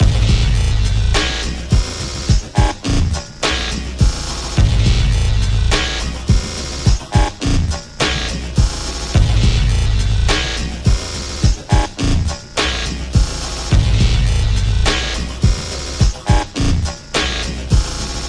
Welcome to the basment
Played at 105BPM features a dub/horror industrial sounding experiment. Good for titles screens, or mysterious dark situations